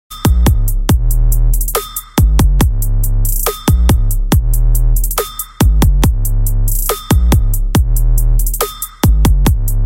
Hip-Hop Beat 3
A cool sounding loop of hip-hop drums. This sound was created with Groovepad.
Made on Groovepad.
bass, beat, deep, drum, drum-kit, drum-loop, drums, groovy, hip-hop, hiphop, loop, loops, percussion, percussion-loop, rap, rhythm, trap, trip-hop